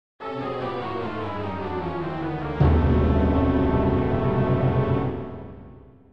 So I decided to create a few failure samples on a music-making program called Musescore. These are for big whopper failures and are very dramatic - they may also be used for a scary event in a film or play. For this project I used violins, violas, cellos, double basses, timpani, cymbals and brass. Enjoy!